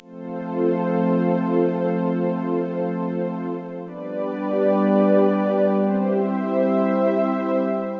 Electronic strings recorded from a MicroKorg. Rising melodic swell of sound.
electronica, synth